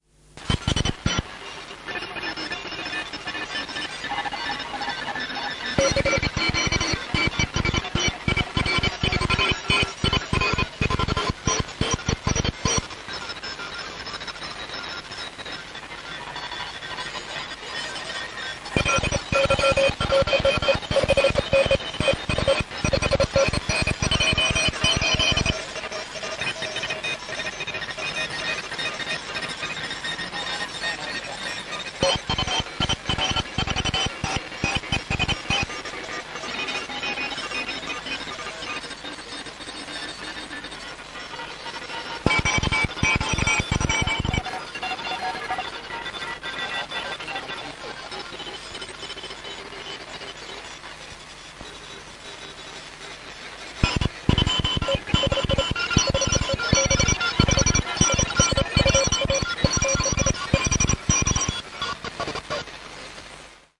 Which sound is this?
Several distorter's morse signals.
electronic, noise